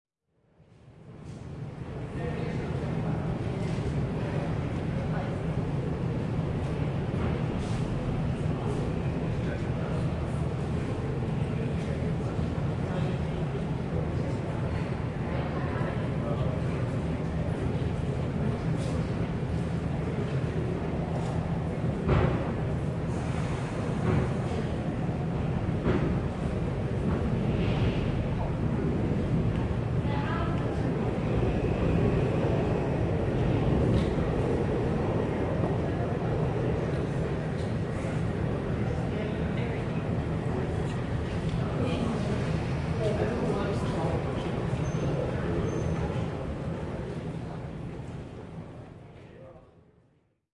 808 Kings Cross Underground 5
The general ambience inside Kings Cross tube station in London with a big reverberating atmosphere and the sound of an underground train on a distant platform.